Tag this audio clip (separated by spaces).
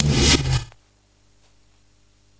dragon amen dungeons breaks medievally rough breakbeat medieval breakcore idm